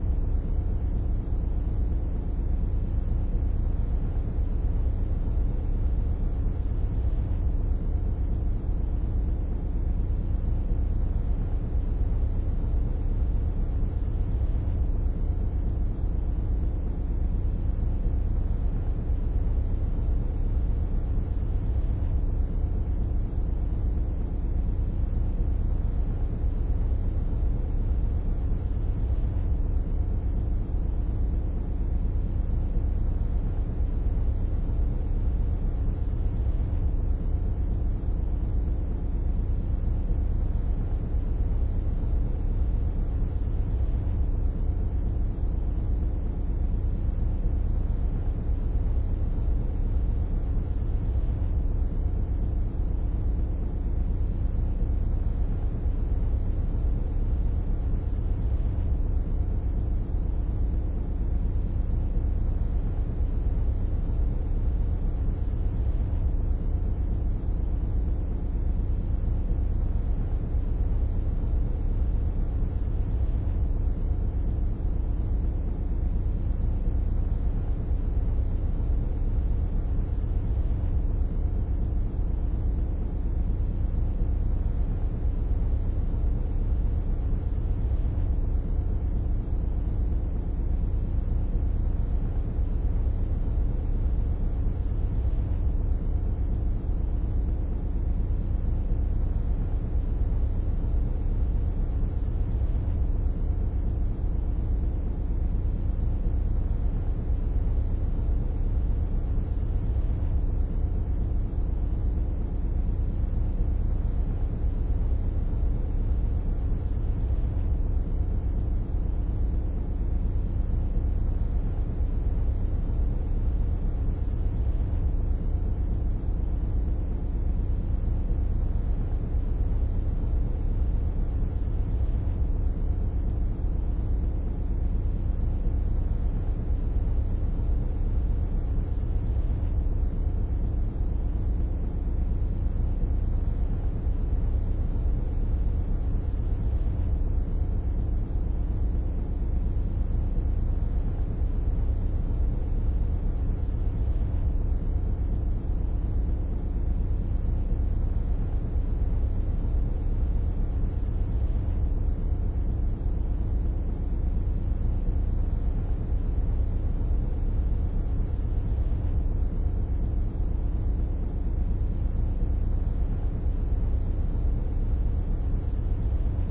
Boat Engine
Large cargo ship with engine running in port.
boat, engine, motor